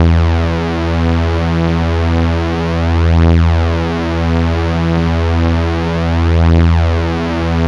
Fake reese with detuned sawtooths
detuned, saw